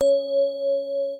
A collection of 27 samples from various sound sources. My contribution to the Omni sound installation for children at the Happy New Ears festival for New Music 2008 in Kortrijk, Belgium.